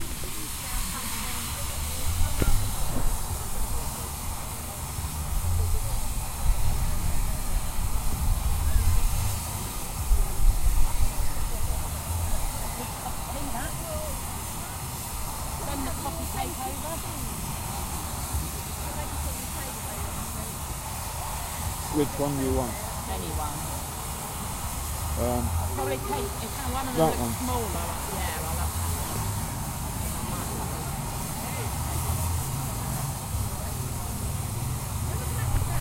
Letting off steam, eating cakes!
field-recording, show, steam, talking